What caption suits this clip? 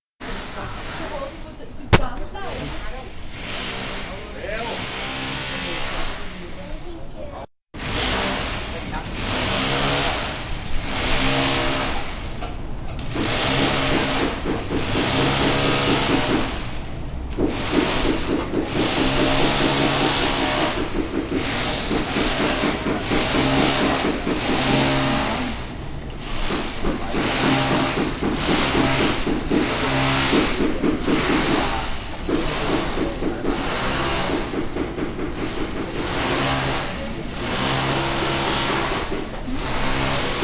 Recorded in Bangkok, Chiang Mai, KaPhangan, Thathon, Mae Salong ... with a microphone on minidisc
street, thailand, temples, machines